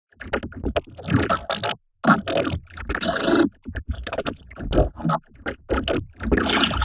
Just some dubstep growls I made in FL.
bass, monster, dubstep, growl, wobble, vocoder, low